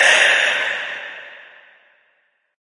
Me breathing up, reversed with reverb.